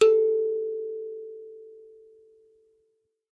Kalimba (note A + harmonics)
A cheap kalimba recorded through a condenser mic and a tube pre-amp (lo-cut ~80Hz).
Tuning is way far from perfect.
ethnic, instrument, african, thumb-piano, piano, kalimba, thumb